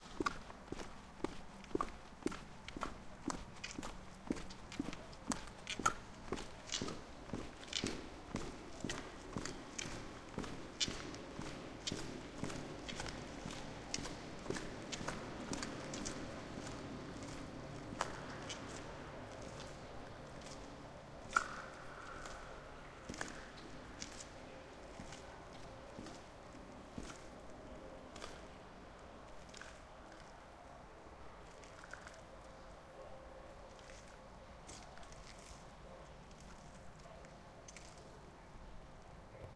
walking into an underpass that has reverb and some water drips and slowing down the tempo until we stand. creepy atmosphere. can also be used as cave with an asphalt entrance